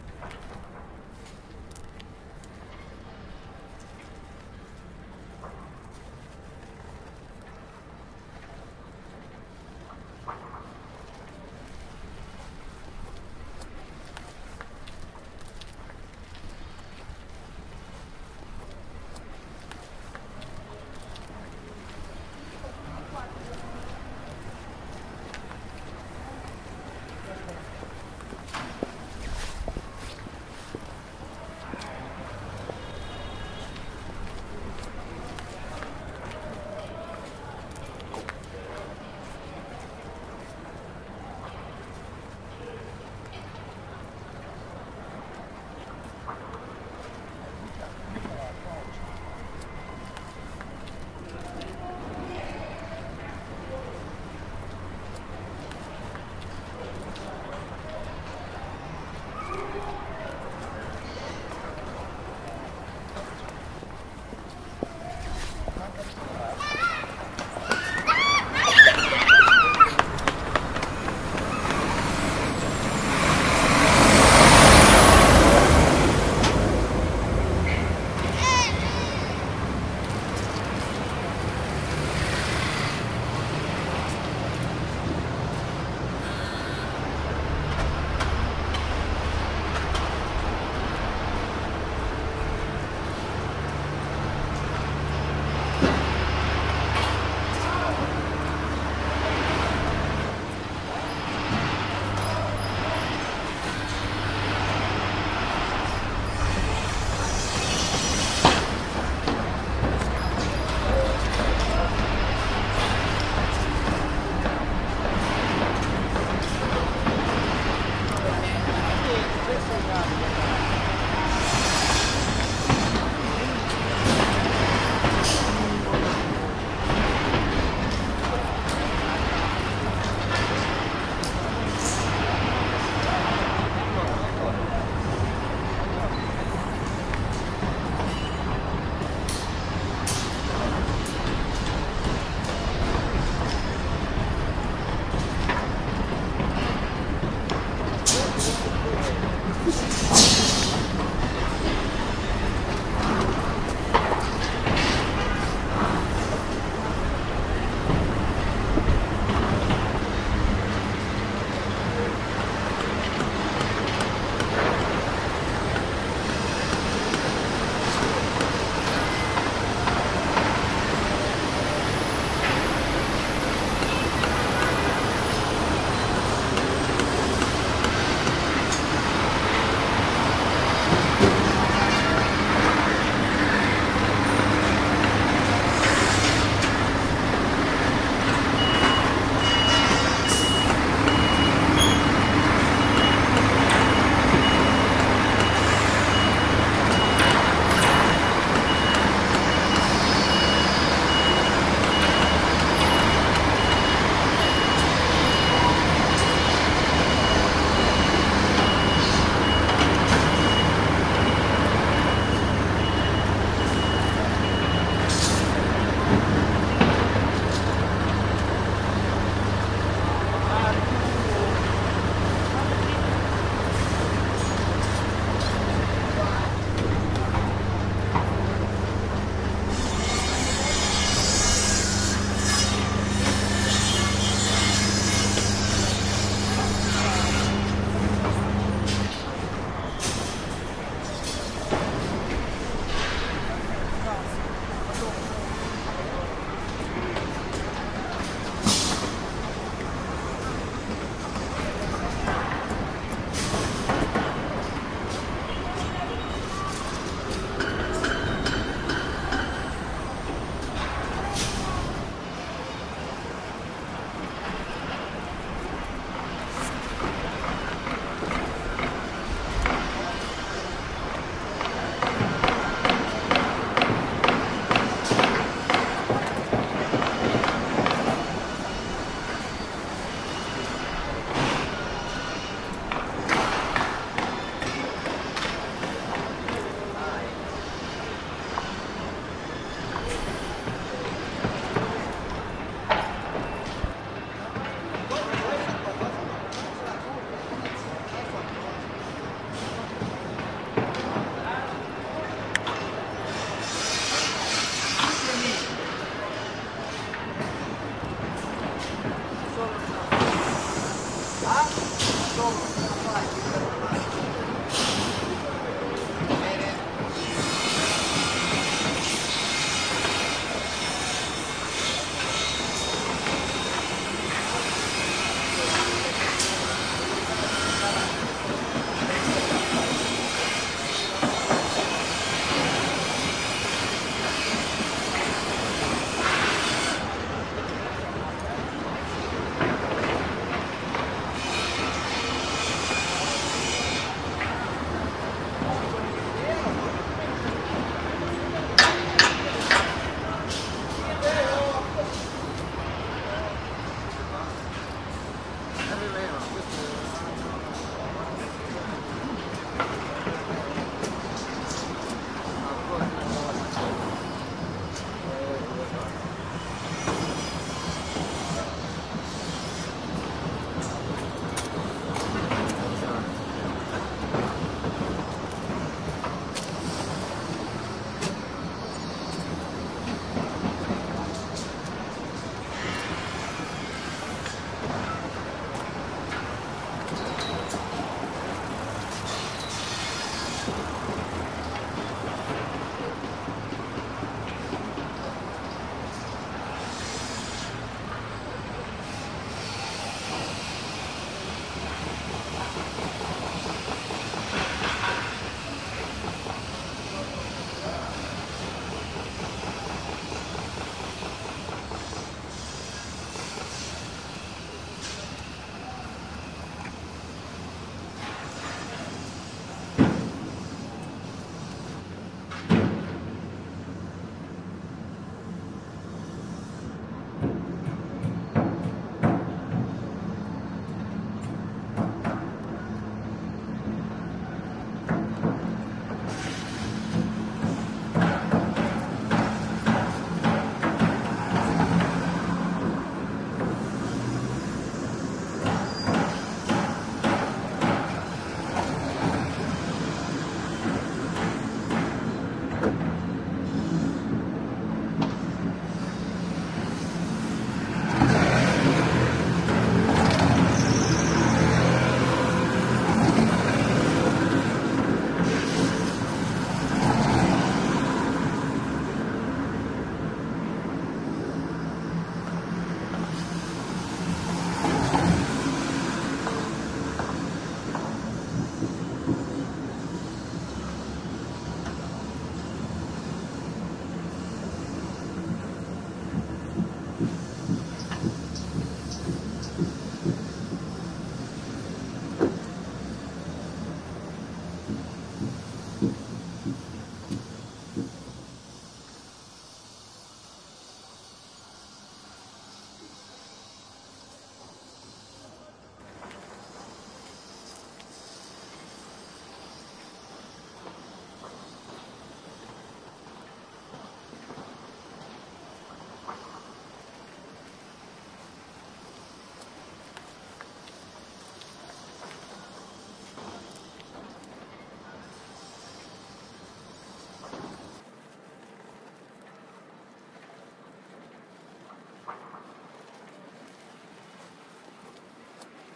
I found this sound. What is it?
piersaro-cerami
Soundwalk. part of the field recording workshop "Movimenti di immagini acustiche". Milan - October 29-30 2010 - O'.
Participants have been encouraged to pay attention to the huge acoustic changes in the environment of the Milan neighborhood Isola. Due to the project "Città della moda" the old Garibaldi-Repubblica area in Milan has turned into a huge bulding site.
isola, massobrio, milan, orsi, soundwalk